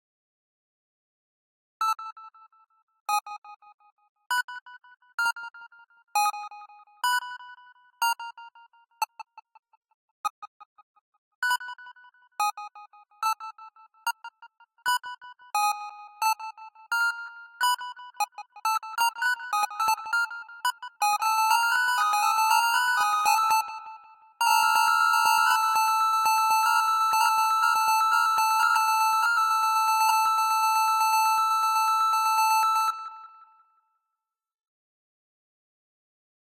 Techno melody
melody, techno